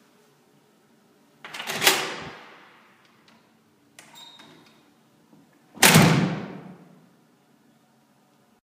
A big door opens and closes